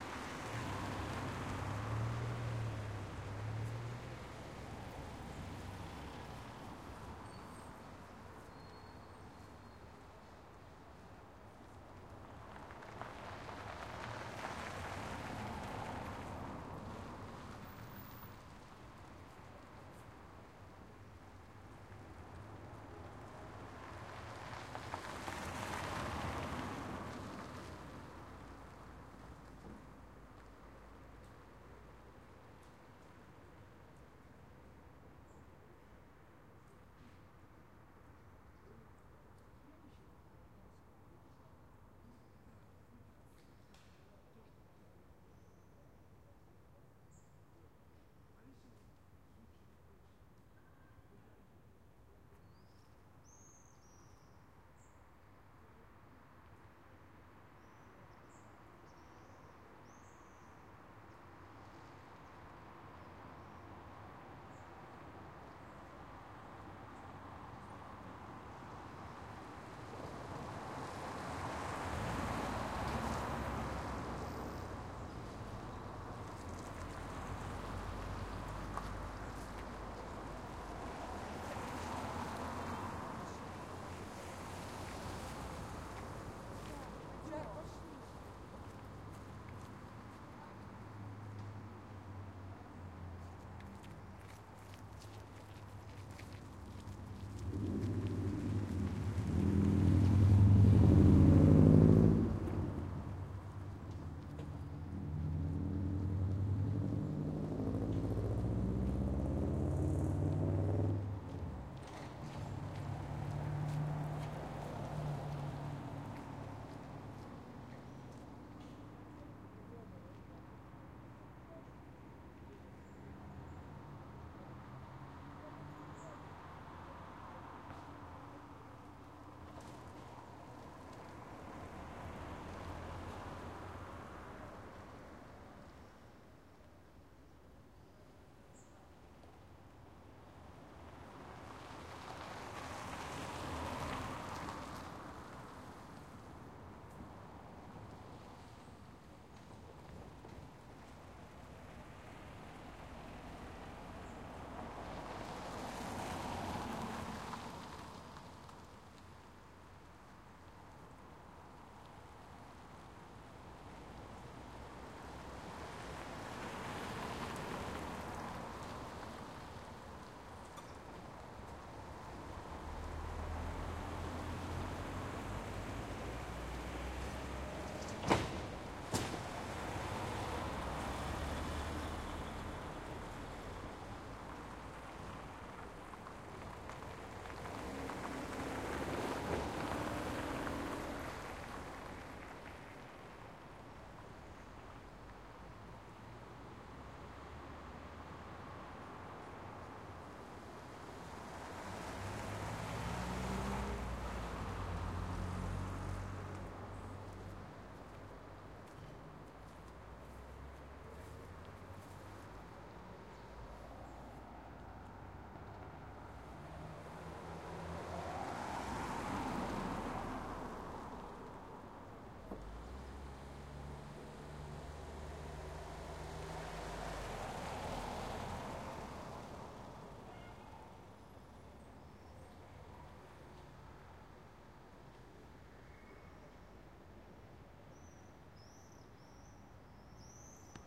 Close to the Road. Quiet district in Yaroslavl city in Russia. Cars.
This sound is recorded close to the road in a quiet district of Yaroslavl city in Russia. Evening summer ambience. Traffic, russian cars of course)
ambience, atmosphere, background-sound, road, russia, traffic